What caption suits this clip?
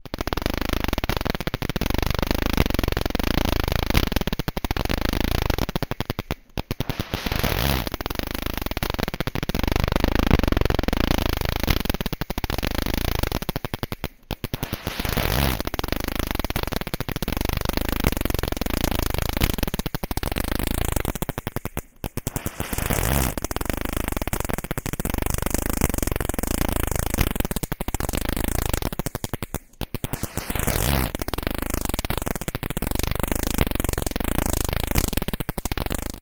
Spoke Spinna 04
Da spoke, da spins, da storted. Field recording of a bike tire spinning, ran through several different custom distortions.
click, distorted, bicycle, spoke, weird, static, noise